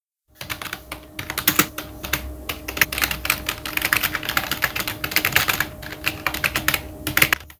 keyboard Typing
Recorded audio of me, typing on a keyboard. And edit the audio in Audacity . Well kinda.
Typing, computer, Keyboard